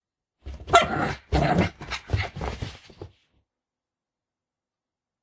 A little pug bark. He's a silly pug.